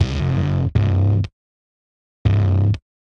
80 Grimey Pulse Bass 02
basslines, dist, drillnbass, free, grimey, guitar, hiphop, lofi, loop